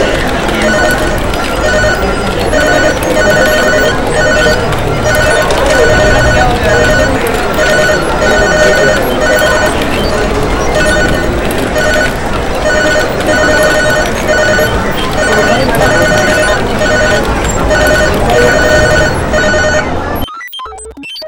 Stock market/office noise
noise, office, phones-ringing, computers, stock-market, phones, wall-street